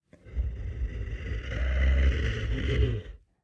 Bear growl, emulated using human voice and vocal transformer
animal, bear, beast, breath, breathe, breathing, creature, growl, horror, inhale, monster, roar, scary